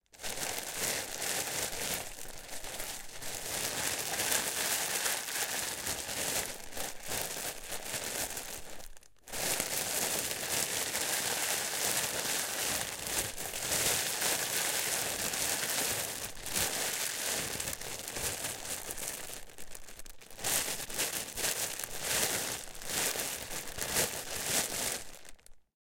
plastic bag rustle
Plastic bag rustling. Recorded with Behringer C4 and Focusrite Scarlett 2i2.